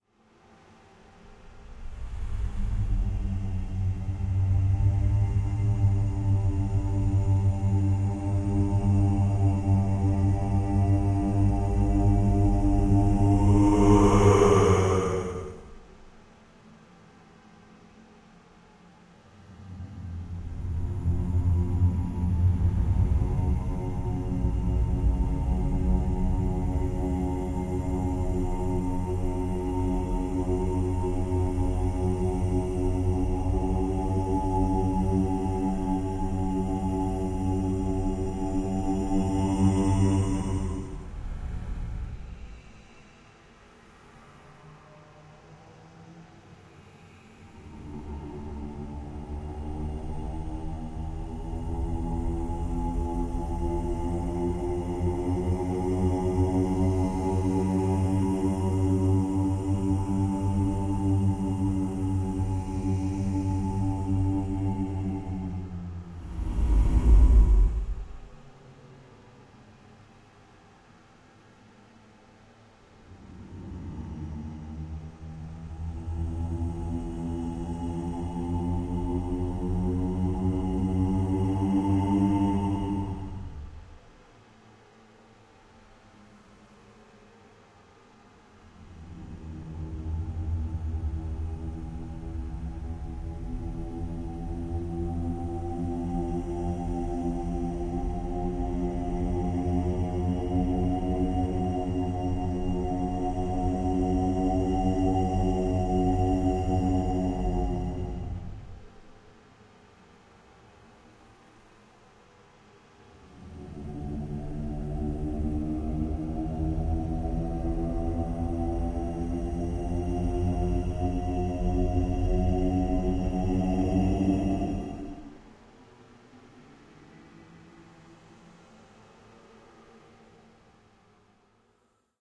Ghost Activity 2
Ghostly moans.
Hunting trolls since 2016!
BTC: 36C8sWgTMU9x1HA4kFxYouK4uST7C2seBB
BAT: 0x45FC0Bb9Ca1a2DA39b127745924B961E831de2b1
LBC: bZ82217mTcDtXZm7SF7QsnSVWG9L87vo23
creepy, ghost, halloween, haunted, phantom, scary, spectre, spooky